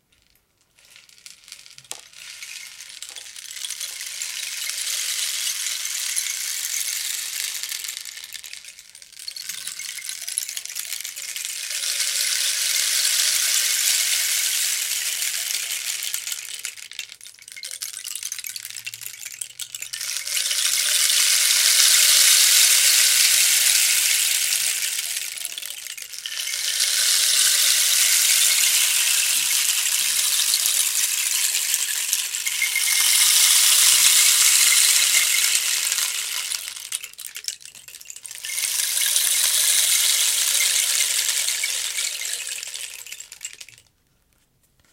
rainstick in studio
instrument, rain, rainstick